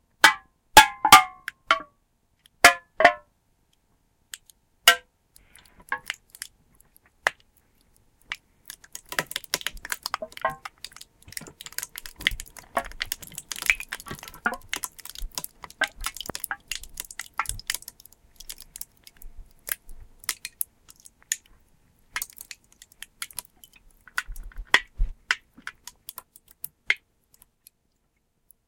Crushing a can
Me crushing a soda can with a seat clamp.